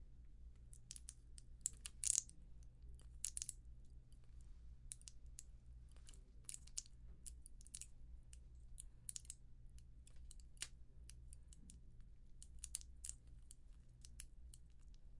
5-wood fire
wood fire sound
fire, flame, wood